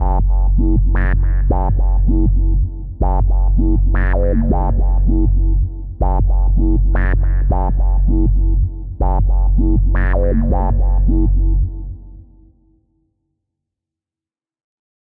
Dark, acidic drum & bass bassline variations with beats at 160BPM